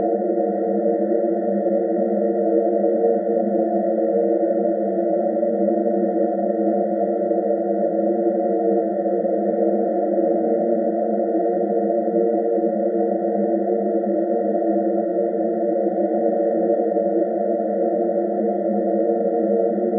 BGvesselNoise4 Choral
It is here just to give you some options, just like the other numbered variants. Created in cool edit pro.
ambient, background, choral, engine, noise, synthetic, vessel, voices